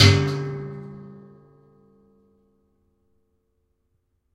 One of a pack of sounds, recorded in an abandoned industrial complex.
Recorded with a Zoom H2.
clean, percussion, percussive, city, industrial, high-quality, metallic, field-recording, metal